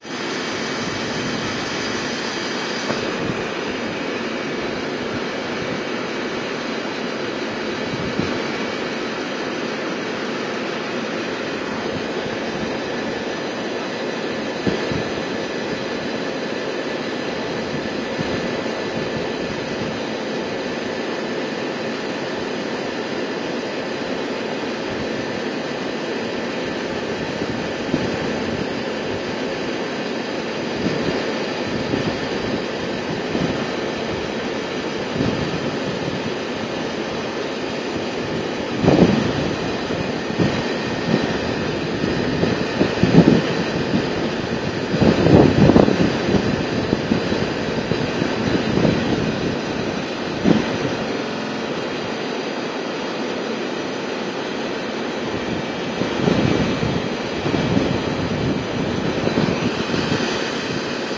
Air conditioning Vent outside
Air conditioning vent outdoors. Loud. Recorded with an app on the Samsung Galaxy S3 smartphone
machine, vent, air-conditioning